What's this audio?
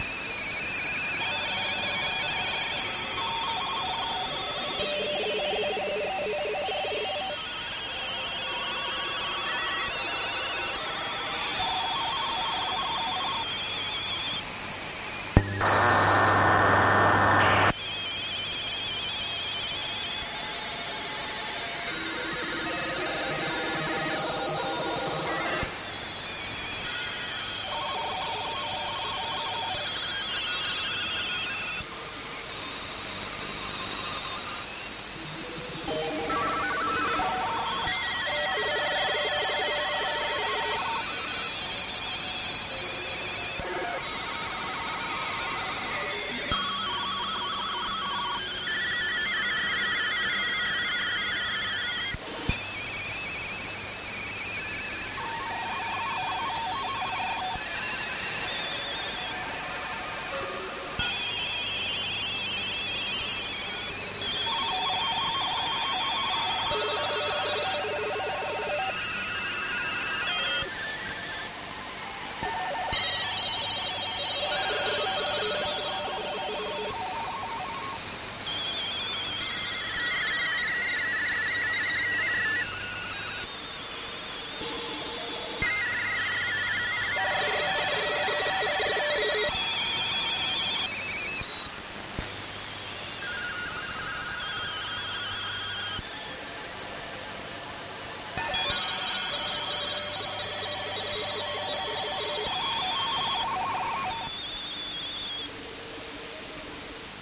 Again shortwave 21m band around 14kHz.
Recorded 1 Oct 2011.
shortwave, digital, transmission, morse, noise, communication, beeping, data, short-wave, beep